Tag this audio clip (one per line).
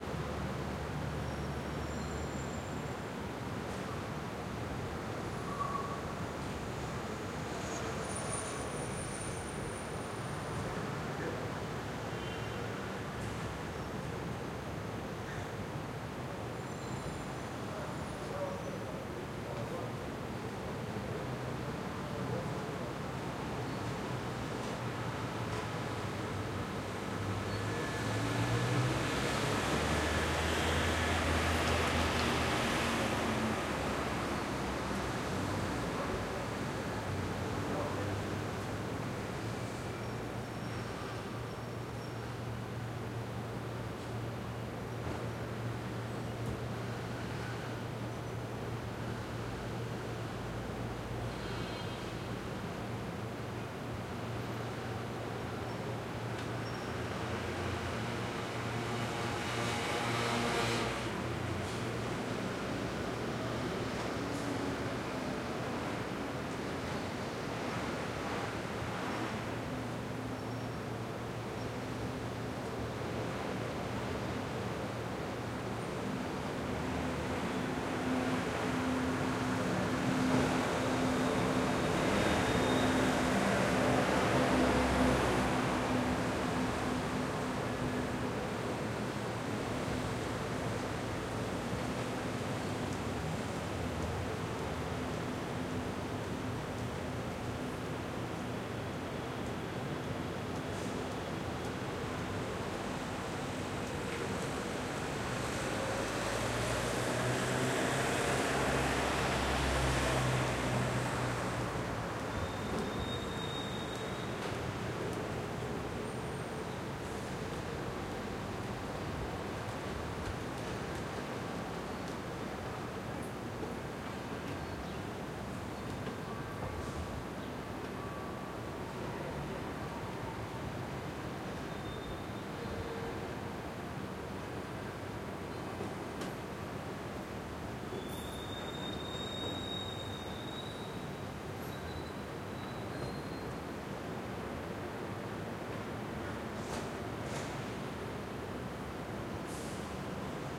monte
ambience